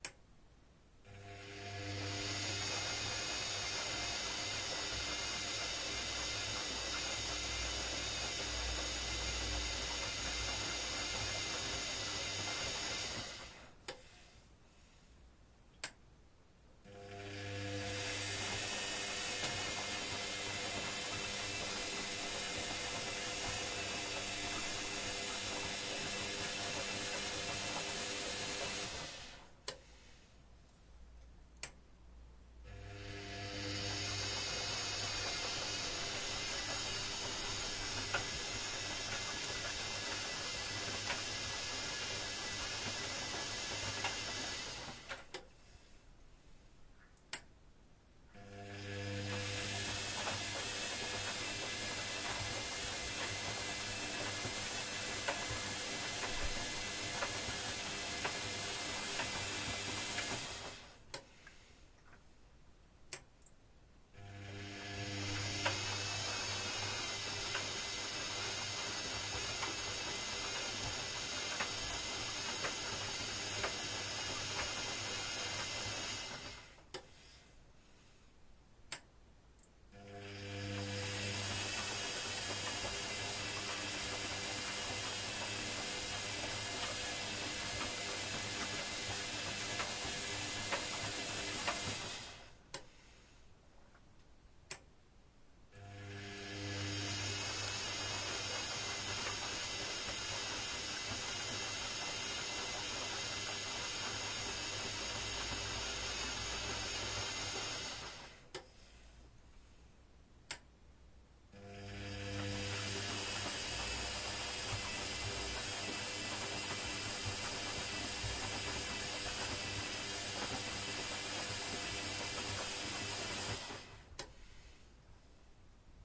Washing machine 20130512
My washing machine is doing the laundry. The recording consists of eight "cycles" when the drum is rotating inside machine. Recorded with my trusty Olympus LS-10.
machine, washing